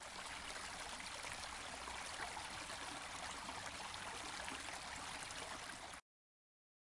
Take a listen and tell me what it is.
This sound is of a flowing stream.
Stream, Water, Running